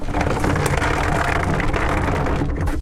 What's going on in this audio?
industrial sound design
design, industrial, sound